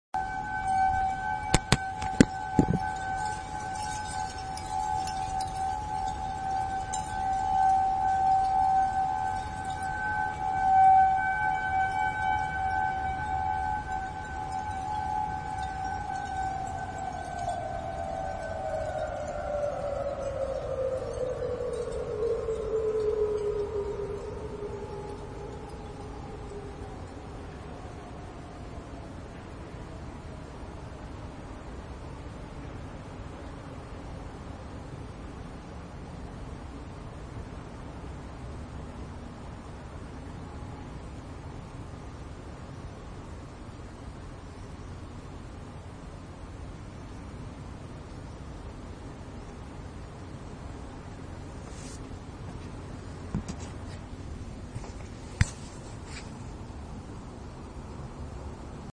Tornado Siren winding down
Tornado warning sirens winding down in Atlanta, Georgia. April 2011
warning, siren, tornado, georgia, atlanta, usa, atmospheric